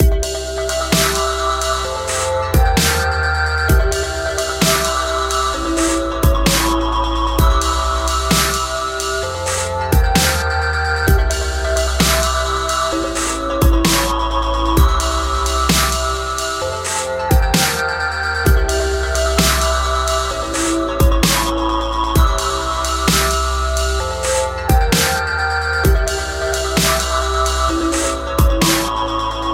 Glass Labyrinth Loop
background, loop, beat, synthetic